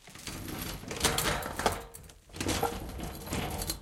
Rummaging through objects
clatter, objects, random, rumble, rummage